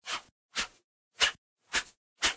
Sword Swings
The sound of a sword swinging in air. *miss*